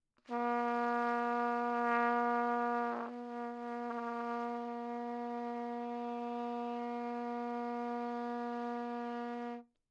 overall quality of single note - trumpet - A#3
Part of the Good-sounds dataset of monophonic instrumental sounds.
instrument::trumpet
note::Asharp
octave::3
midi note::46
tuning reference::440
good-sounds-id::1419
Asharp3, single-note, multisample, good-sounds, neumann-U87, trumpet